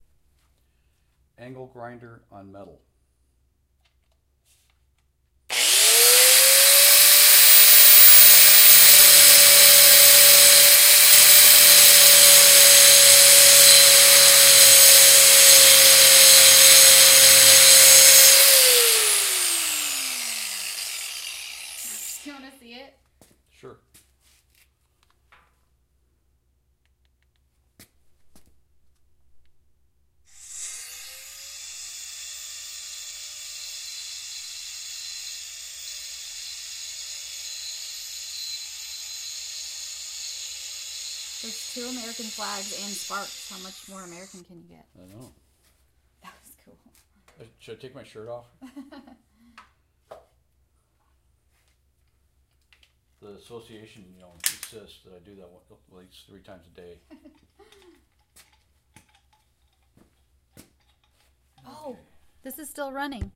Angle Grinder on metal bar